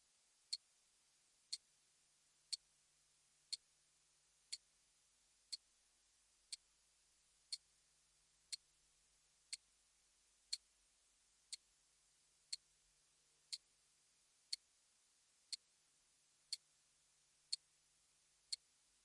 wristwatch-ticking-KMi84 stereo
Recording of a common wristwatch ticking on a sound-insulating foam padding. SE-Mic cardio, Fostex FR2. Neumann KMi84 cardio, Fostex FR2.
watch, wristwatch, clock, ticking, tick-tock